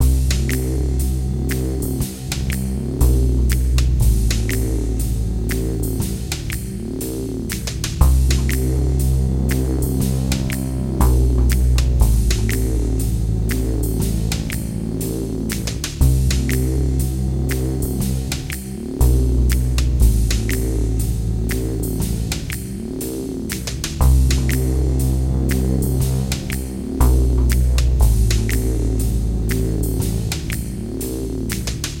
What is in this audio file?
Beatloop 3 -120 BPM
an ominous dark piece